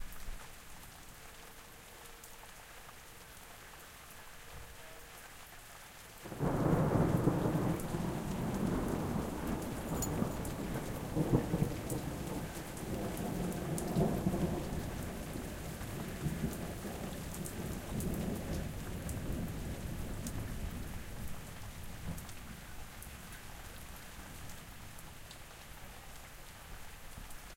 Rain and Thunder 3
field-recording, rain, thunder, thunderstorm